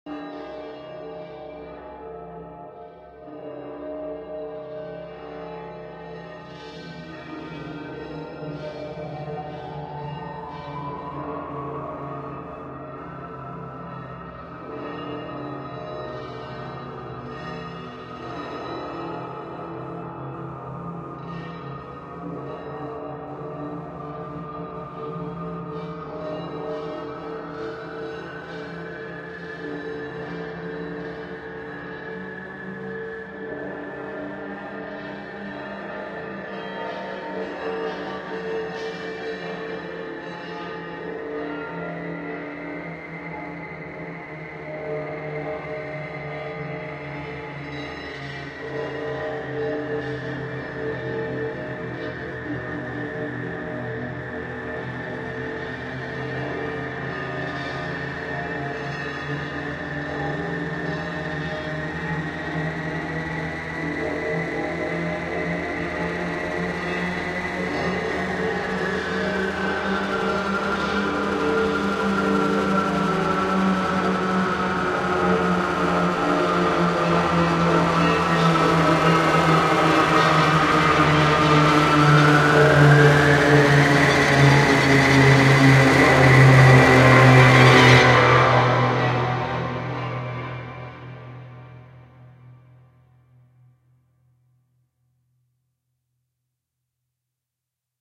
Bells from Hell (One Shot)
Ambience, Bell, slow, Ticking